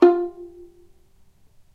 violin pizz vib F3
violin pizzicato vibrato